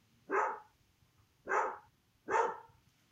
Distant Dog Barks
A dog barking a bit of distance away.